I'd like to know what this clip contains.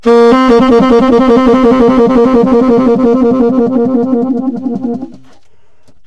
TS tone trill bb2
The format is ready to use in sampletank but obviously can be imported to other samplers. The collection includes multiple articulations for a realistic performance.
jazz, sampled-instruments, sax, saxophone, tenor-sax, vst, woodwind